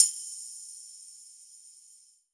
Tambourine Hi with long thin reverb
Amb, Live, Music